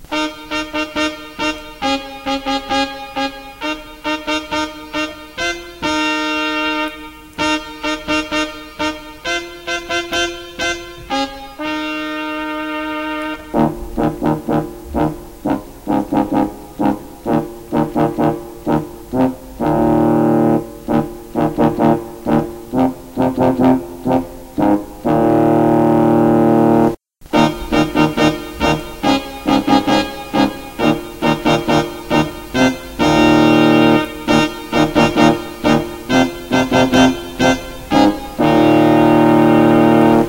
This was just a little test sound, recorded with a the t-bone from my keyboard.
It shall sound like:
The King appears!
Recorded with a the t-bone SC440 USB.
Editet with Audiacity.